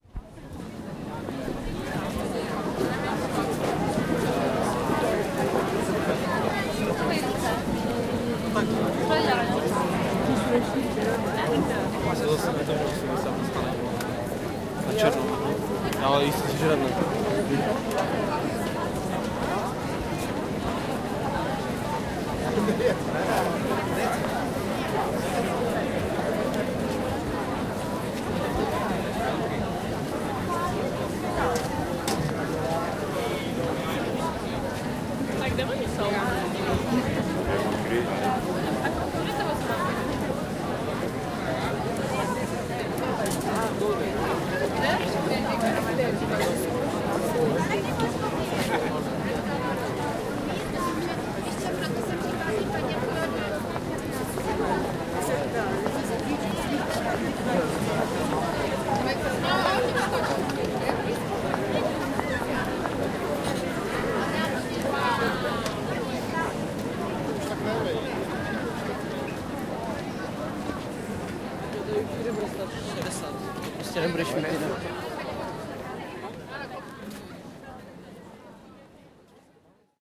Prague city center recorded with Zoom H-1 on 21th December 2013.